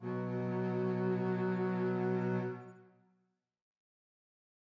Double Bass (B+7th)
These sounds are samples taken from our 'Music Based on Final Fantasy' album which will be released on 25th April 2017.
Double-Bass, Samples, Bass, Music-Based-on-Final-Fantasy, Double